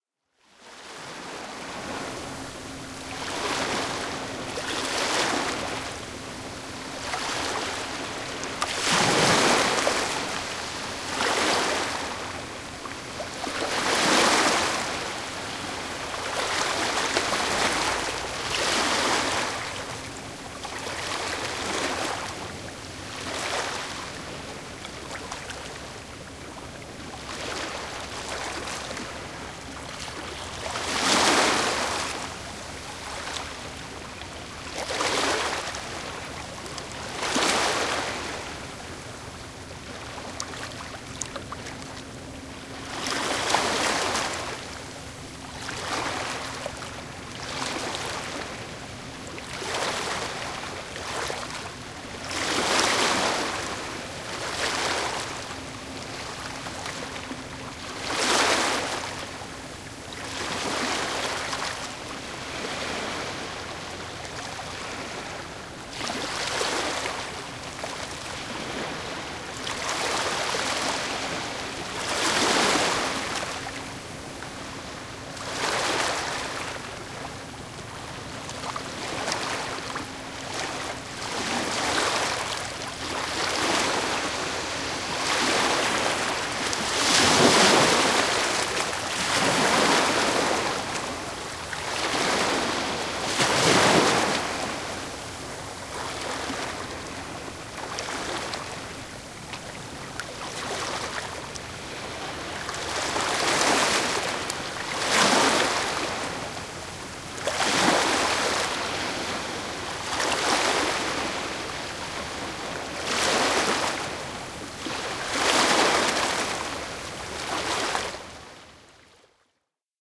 Close field recording of medium ambient waves crushing on the shore of Kalundborg Fjord at Røsnæs. Water cascading through small stones as it pulls back into the ocean. Very distant vessel. Recorded at 2 meters distance, 120 degree with Zoom H2 build in microphones mid February.
ambient, denmark, field-recording, ocean, r, waves